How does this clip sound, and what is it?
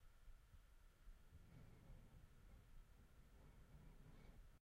A howling wind sound.